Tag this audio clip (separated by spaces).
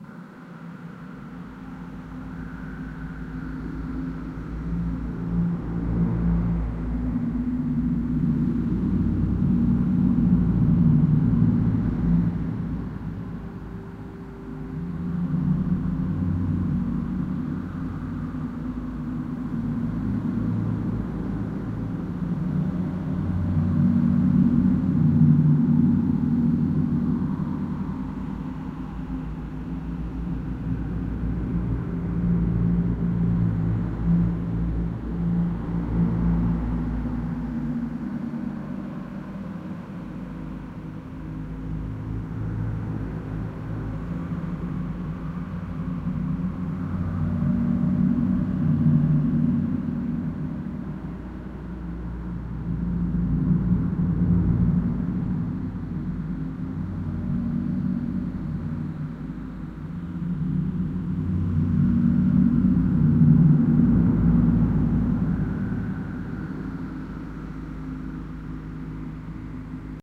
ominous disturbing large wind metal drone plane industry windy